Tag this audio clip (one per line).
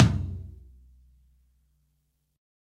drumset
kit
set
tom
drum
realistic
low
pack